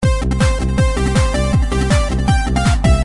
Fusion loop 2a
drumloop, drum, beat, loop, trance, techno